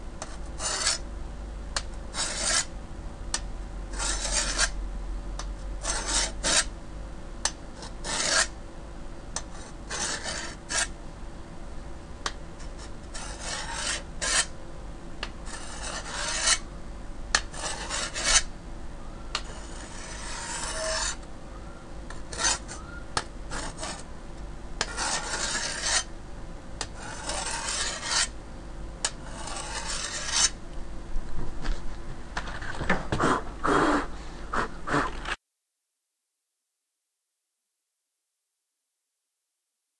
Make by rubbing two rusty hooks together that I found under me house.